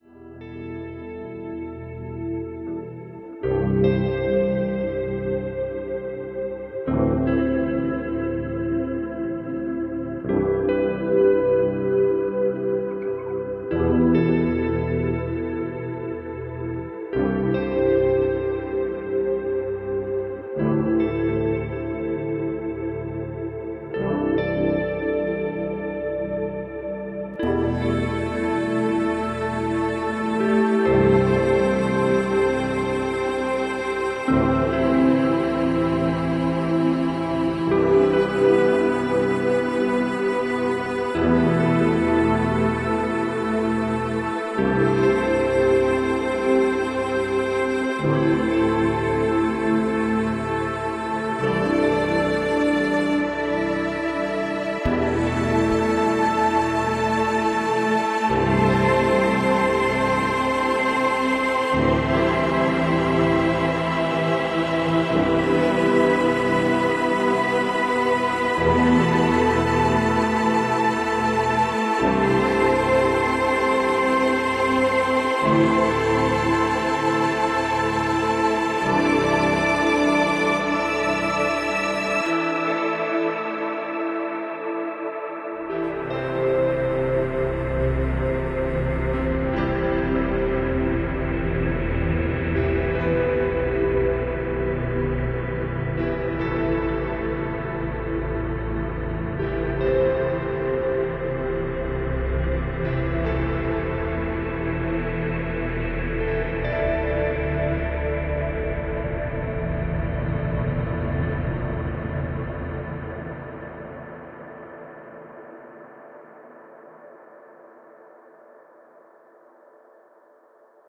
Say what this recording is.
Decay - Depressive melodic ambiant
Piano Cellos and guitar.
ambiant, atmospheric, background, cello, cinematic, dramatic, film, guitar, movie, orchestral, piano, reverb